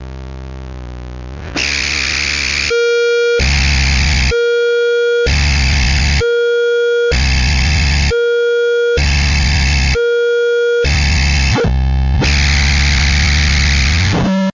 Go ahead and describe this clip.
circuit bending fm radio